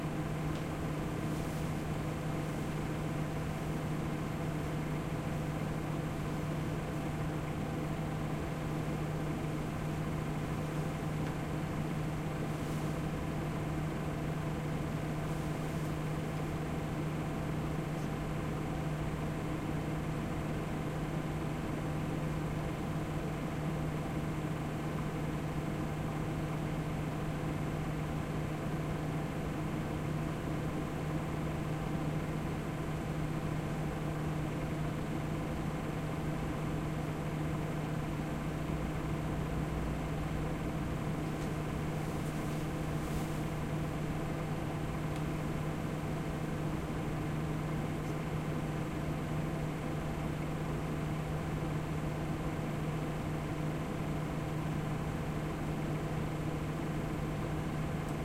Humming machinery
55 seconds of constant hum
humming, fan, buzz